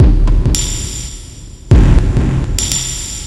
Jovica Massive Loop 43 hf-remix-03
experimental, jovica, loop, massive, remix